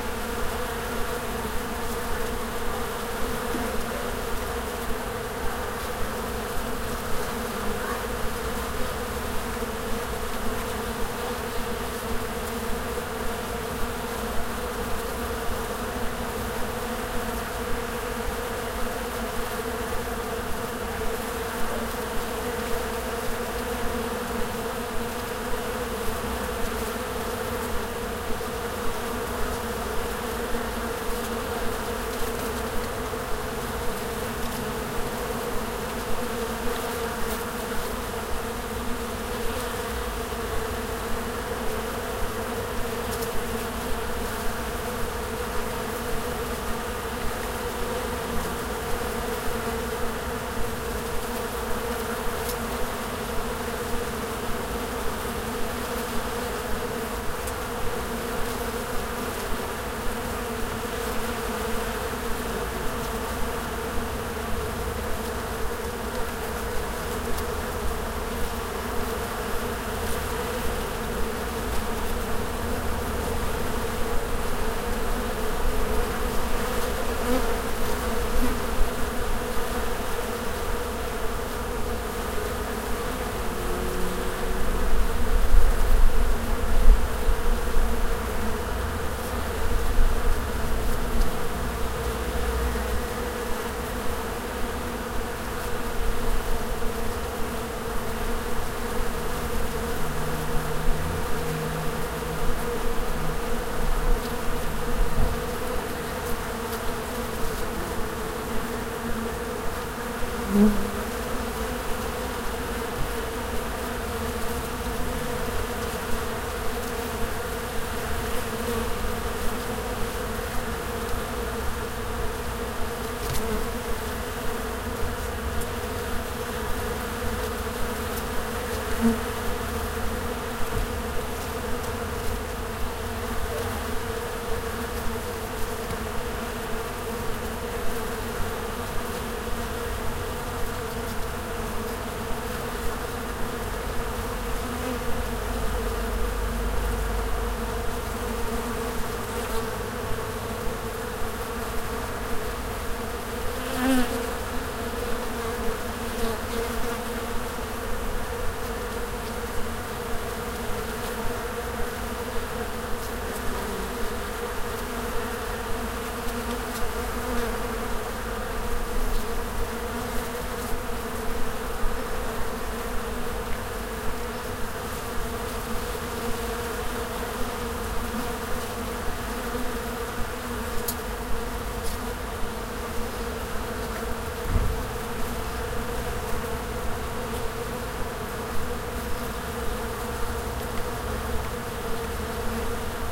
urban-bees
Field-recording of bees in some wine plant at a garage in the city
bees, field-recording, urban, wine